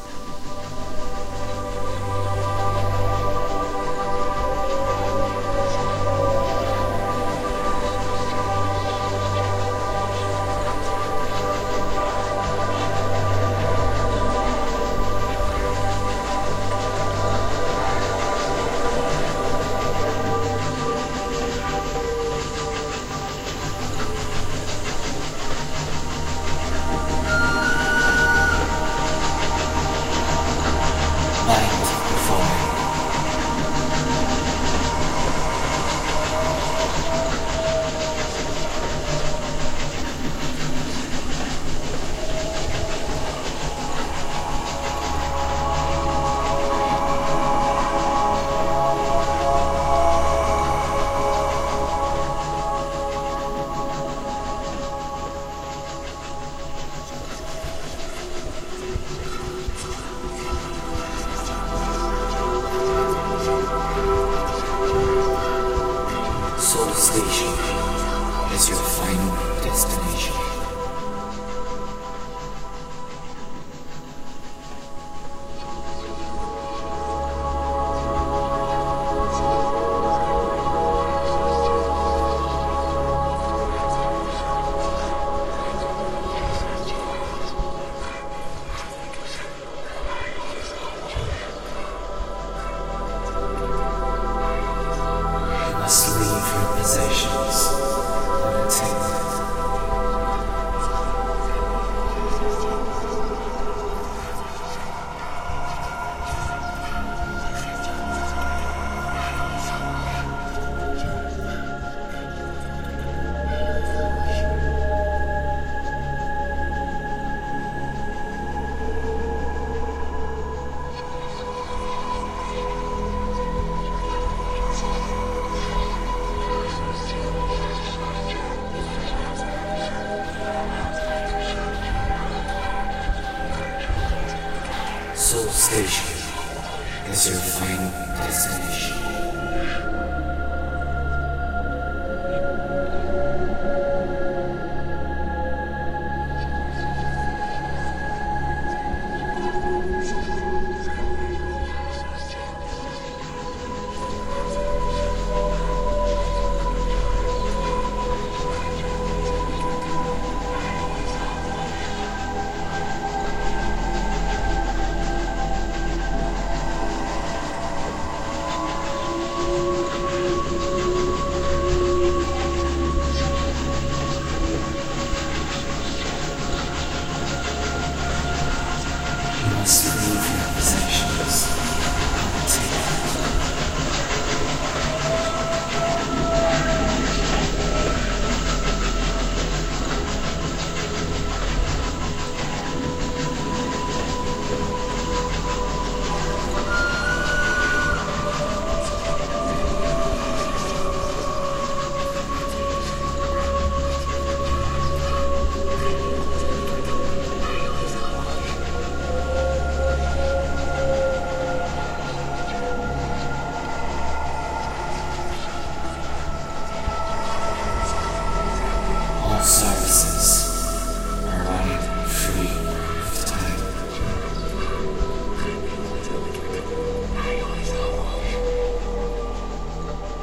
Souls reach their final destination, Soul Station. Whispering souls, station announcements and a steam train running through the station.
#warg:
>Ambient music
#thanvannispen:
>Breathy, windy sounds
#gadzooks:
>Steam train
#euroblues:
>Train whistle
>Voice
ghost, telepack, haunted